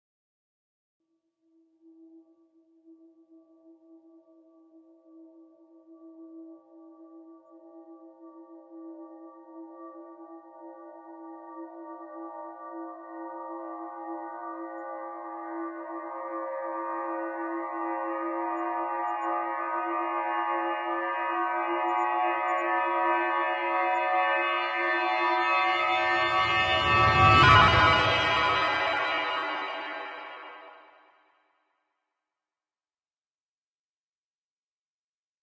A remix of the following sample:
I believe it would make a good "teleportation" sound effect in the vein of Star Trek, Blakes 7, Tron and the like.
I'm always eager to hear new creations!